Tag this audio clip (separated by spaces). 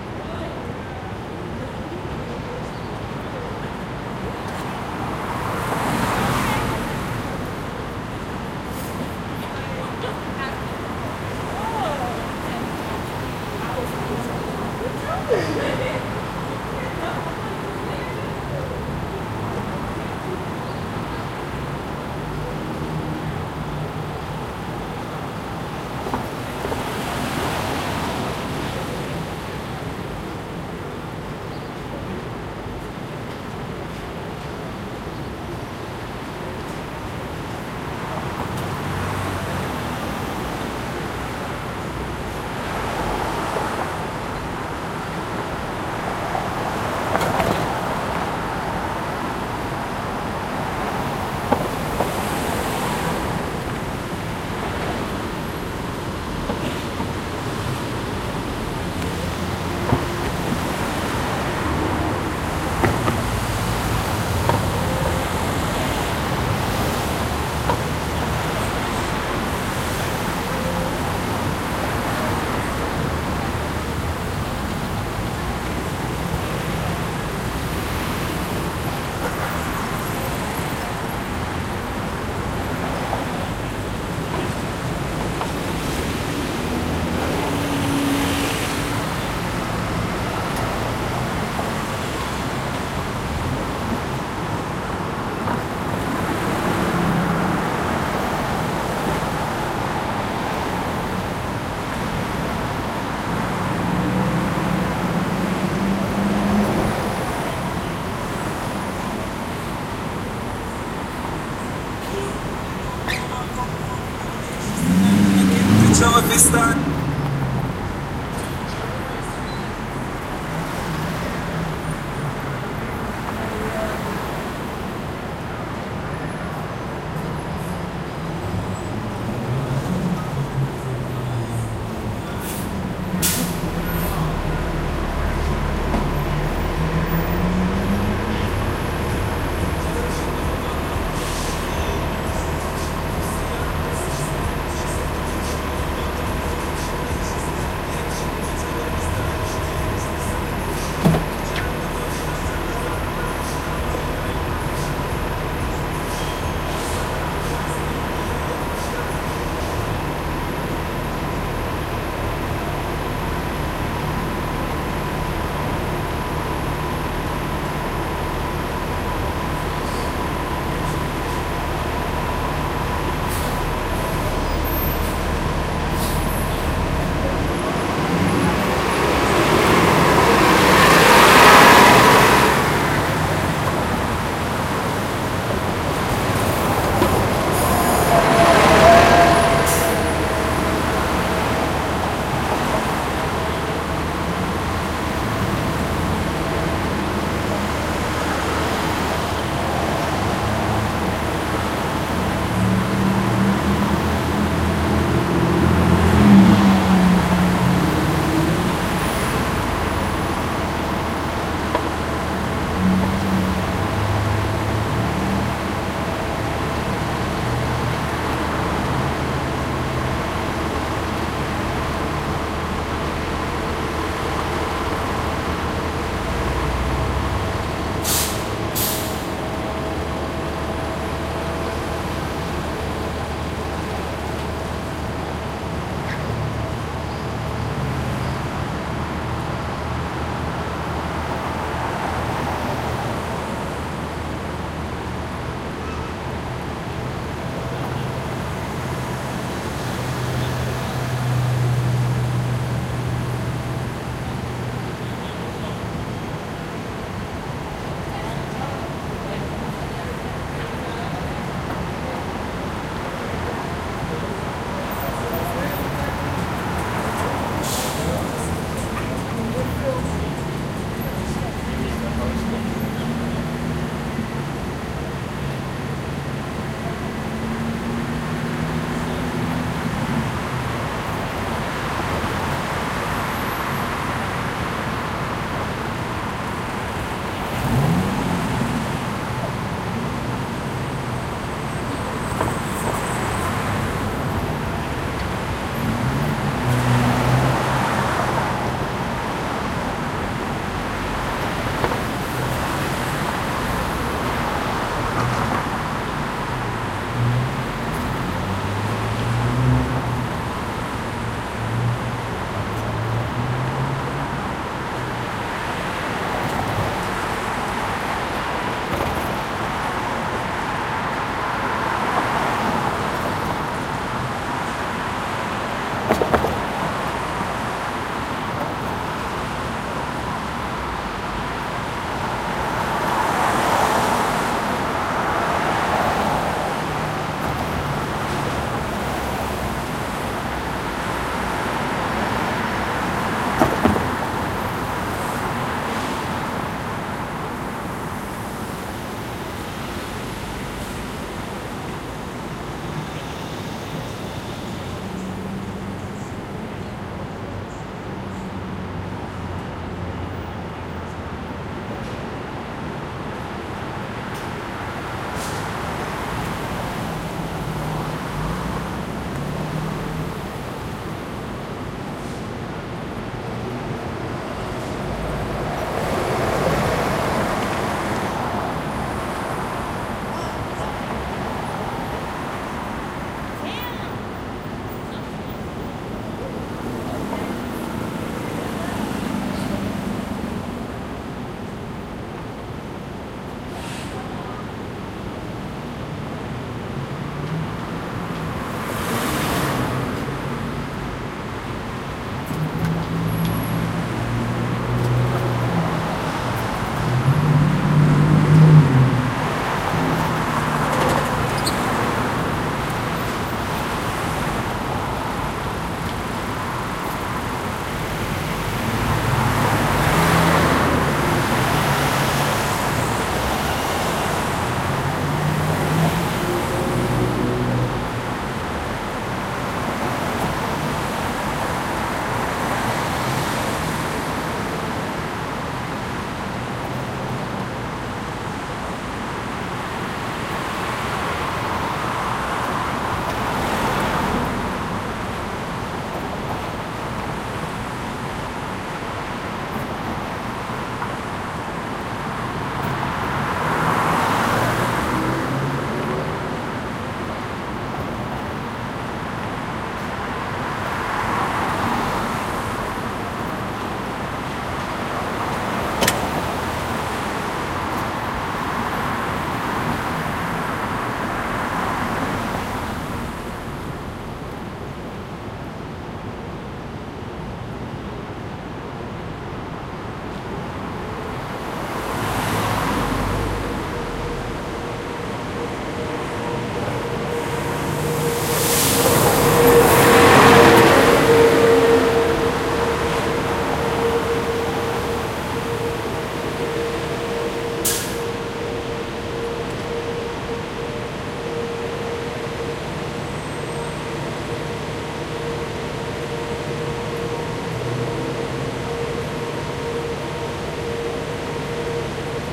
American traffic ambiance background background-sound ambience atmospheric city urban restaurant soundscape street ambient atmo seating field-recording a Inside area from white-noise people atmosphere general-noise fast-food walla atmos